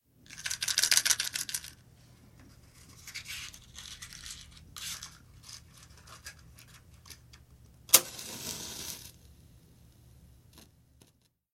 Fosfor prende
fuego, rastrillar